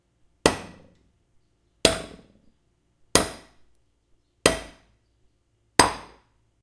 Pick Hitting Rock
A miner's pick hitting a rock wall.